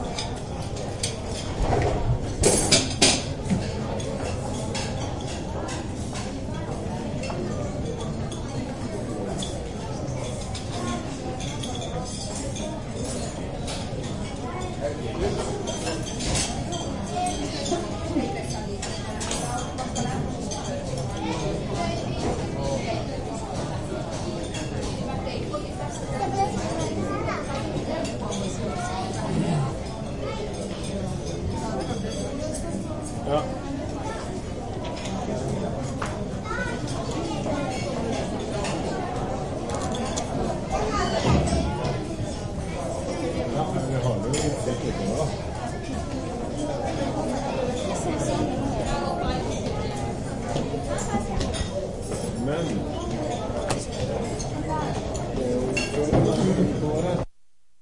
ship-restaurant1
date: summer 1999